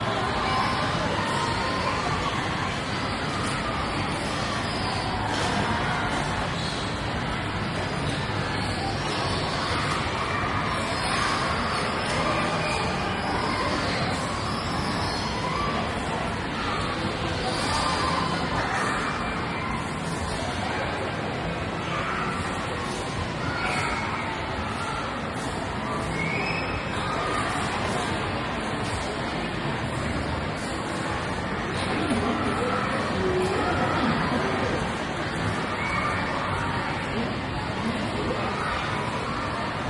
Recorded at a local fun Center. I used a H2 Zoom
arcade, entertainment, games